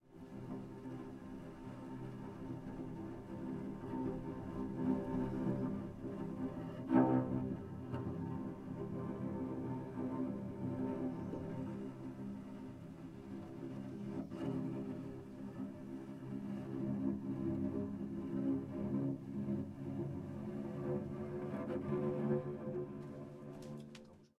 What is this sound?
Concrete Cello 14 Scrapes light with pitch
The "Concrete Cello" pack is a collection of scraping, scratchy and droning improvisations on the cello focussing on the creation of sounds to be used as base materials for future compositions.
They were originally recorded in 2019 to be used in as sound design elements for the documentary "Hotel Regina" by director Matthias Berger for which I composed the music. Part of the impetus of this sampling session was to create cello sounds that would be remiscent of construction machines.
You can listen to the score here :
These are the close-micced mono raw studio recordings.
Neumann U87 into a WA273 and a RME Ufx
Recorded by Barbara Samla at Studio Aktis in France
bow, Cello, concrete, design, film, imitation, instrument, motor, object, objet-sonore, ponticello, quartet, raw, score, scrape, sound, string, sul